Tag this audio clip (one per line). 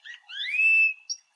whistle
canary
chirp
bird